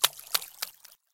The sound of a rock skipping.
Created using this sound: